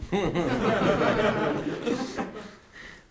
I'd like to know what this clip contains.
people laughing during a course. There is one man, which is quite loud in this recording. Recorded with Zoom h1.
people, auditorium, session, laugh, audience, crowd, concert